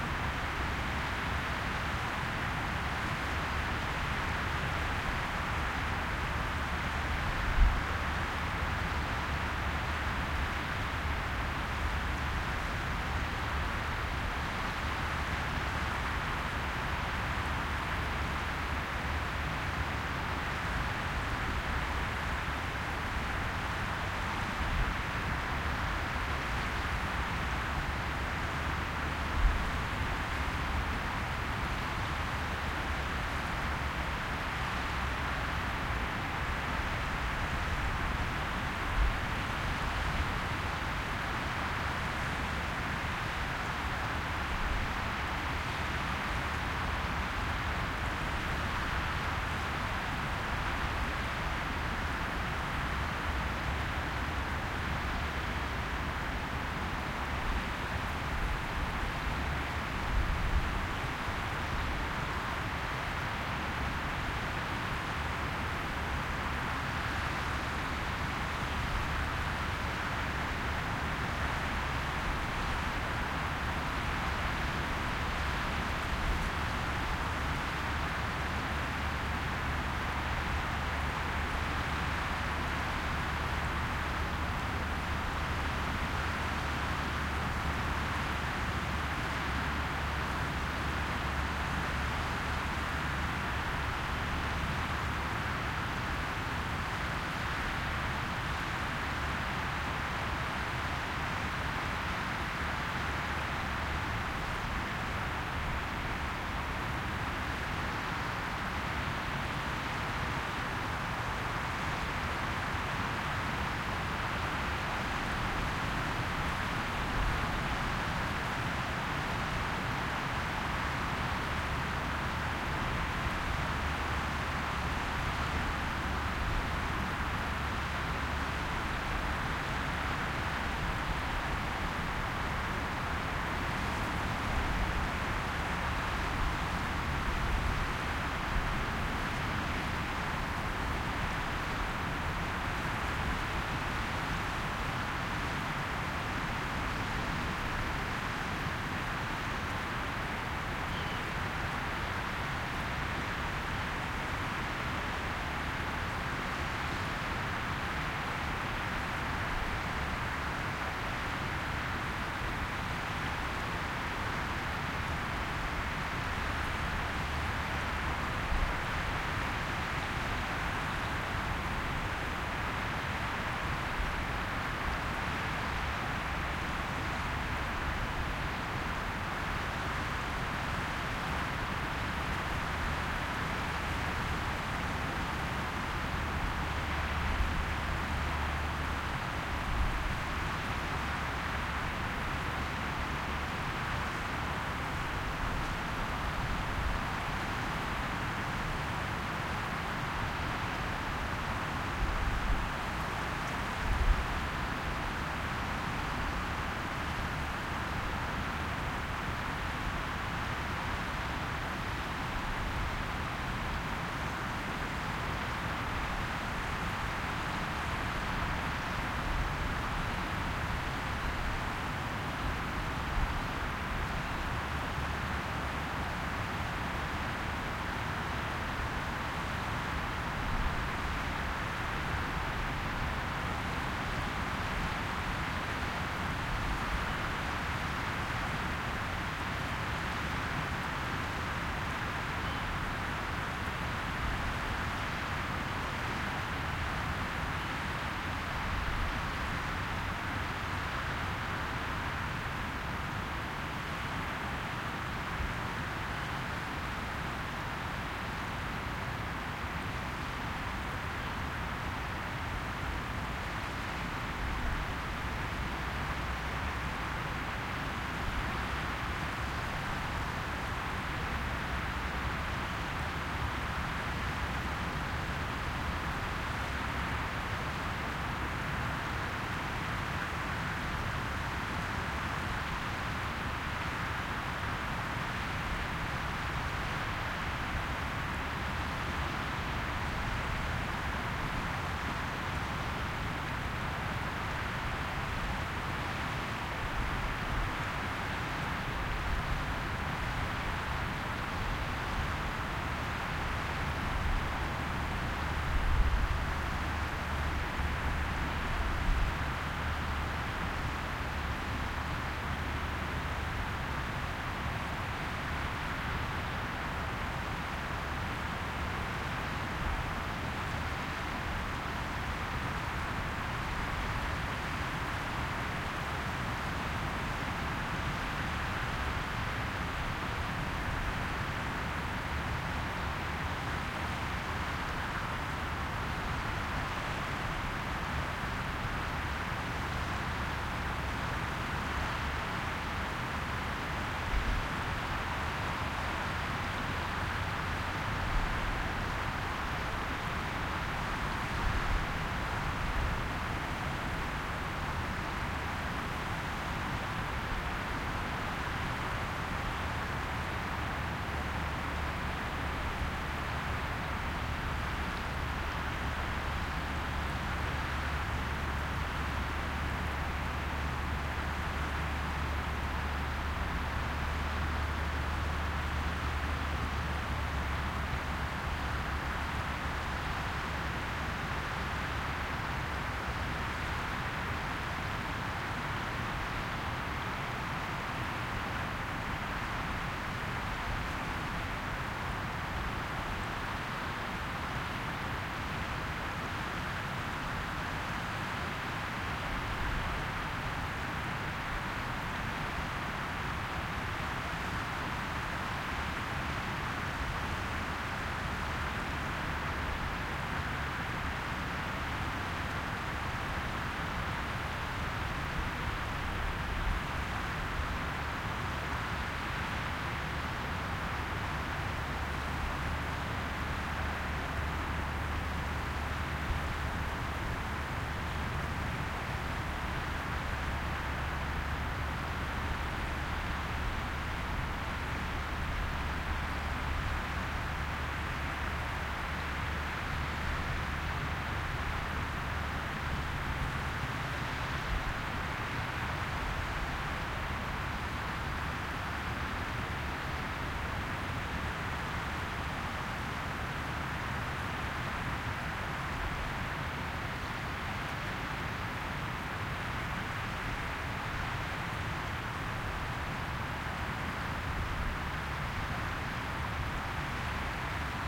sea and wind
Well, if you look on the geotag of this recording you can see, that I´ve been there before and again it was soo early in the morning and there were soo may seabirds: just not saying or singing anything. Instead the distant sea, wind in the forest and all that sounding pretty whitenoisish. MKH 60 microphones into Oade FR-2le.
field-recording, wind, sea, waves, denmark